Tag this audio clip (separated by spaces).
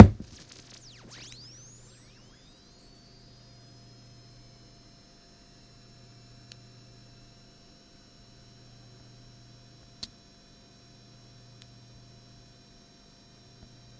alien beam beep computer digital ground high-pitch interference laser problems sci-fi signal space spaceship stereo turning-on ufo